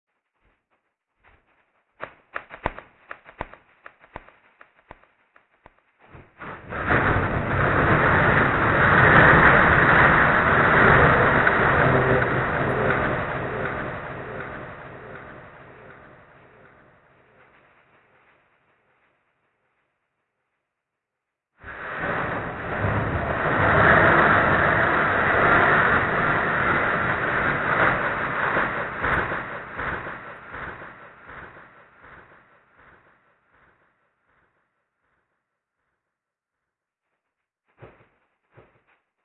Echo Garage Door
Opening and closing the door by hand. A bit of echo added...